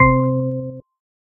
sine thunderbird
This Sound is a Mixture of some Sounds, that i did using only a Sine Wave. I use it as a you-got-mail Sound in Thunderbird.